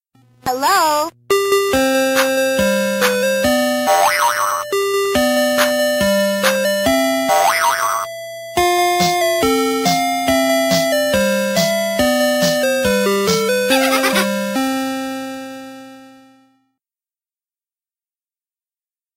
Nichols Omni Music Box - If You're Happy And You Know It
Here is some music from an older ice cream vending chime box, witch is the Nichols Electronics Omni. This song is If You're Happy And You Know It. I like this song a lot, and hope you will too.